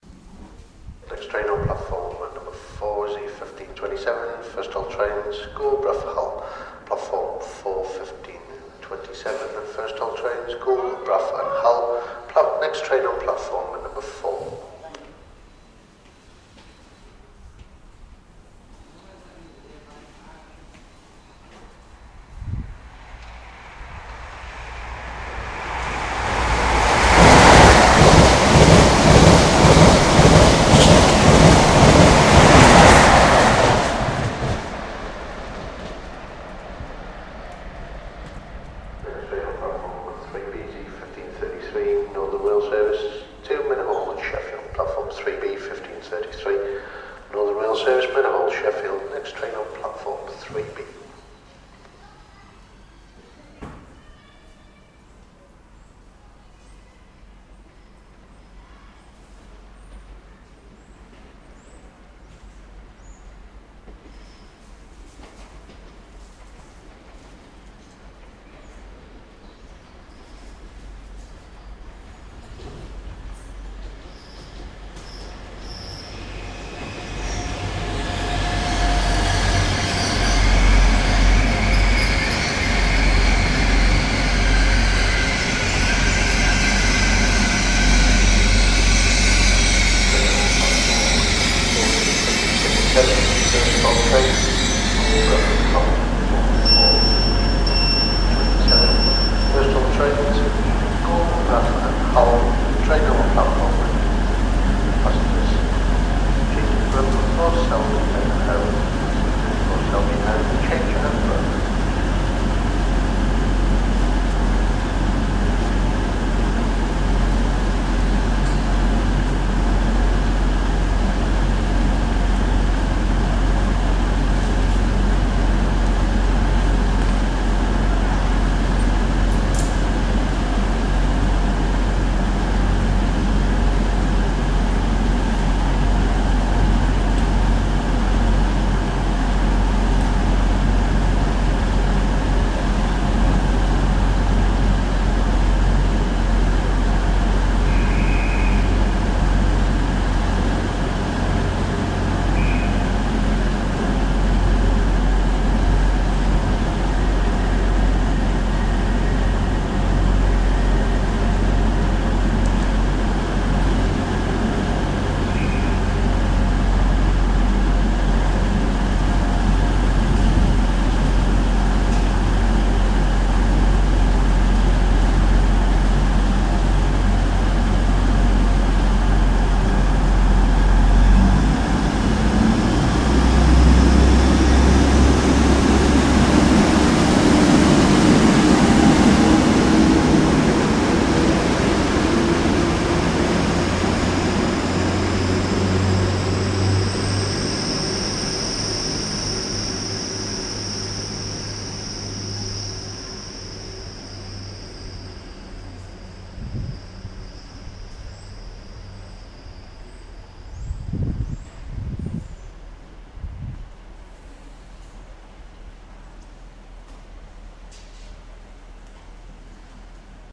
Doncaster station recording with passing HST and more.
rail, station, announcement, train, british, service, network, doncaster, hst, northern